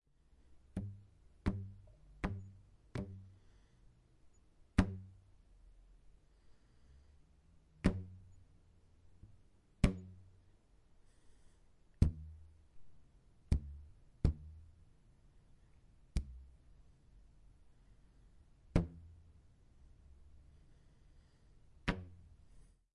Rubber band 1
A rubber band being struck with my thumb. Could be used as an instrument (I advise you to convert the sound to mono if you are planning to use the clip that way).
band, foley, office